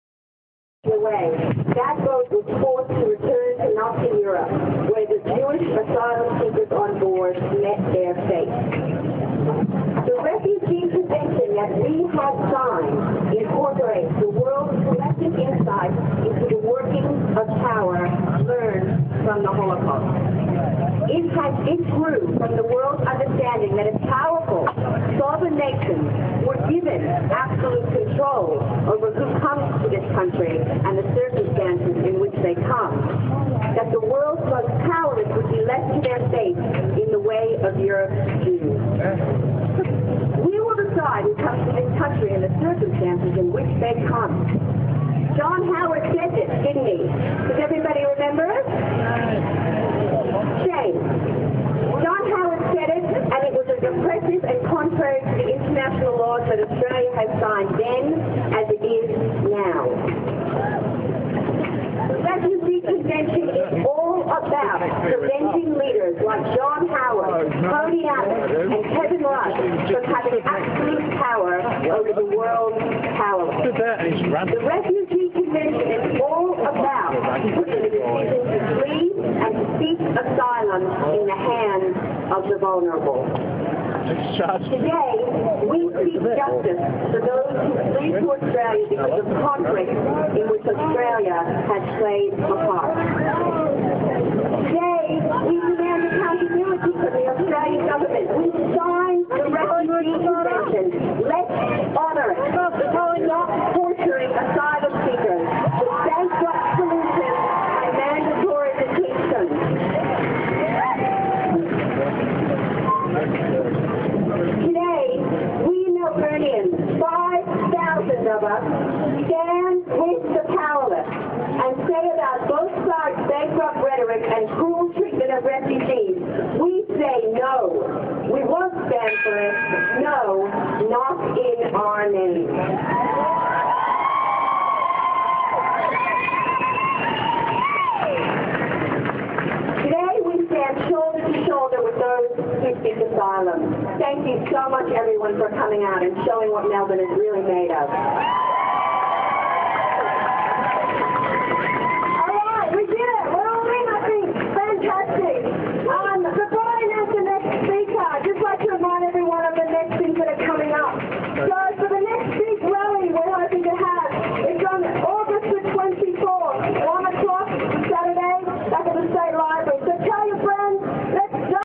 Refuge protest
A Refugee protest in Federation Square, Melbourne late 2013 in passing, recorded a segment from tram stop at Flinders st, on Lifes Good mobile phone, lo fi sample converted in softare